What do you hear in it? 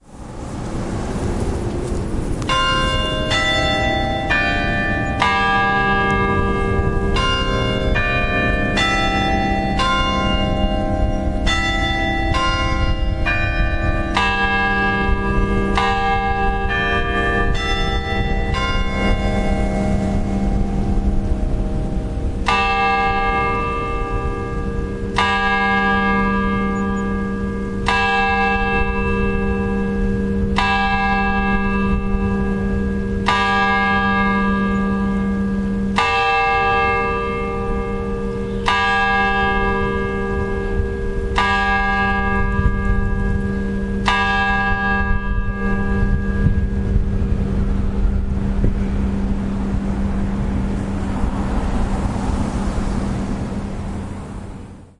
Bell Chime 9
Church bell chiming 9. Recorded in front of church with Olympus WS-802 hooked to SONY stereo lapel mike. Gainesville, GA, early December 1025.